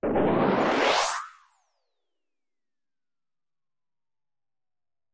ss-sun up
A reverse crash like tone
electronic, percussion